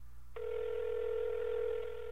phone call ring
Raw recording of a cell phone ringing from the caller's end.
ring, raw, telephone